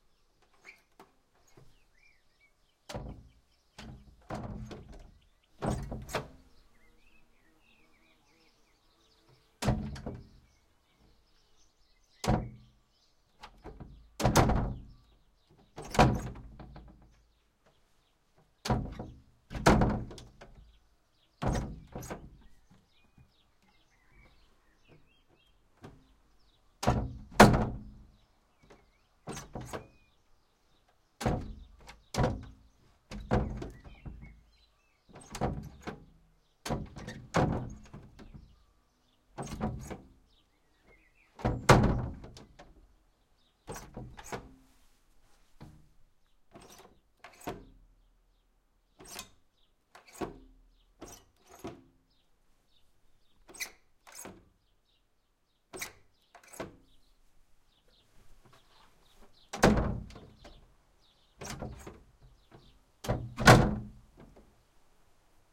wood shutter inner door with antique handle lock open close shut hit frame rattle and handle turn squeaks end various on offmic ext terrasse perspective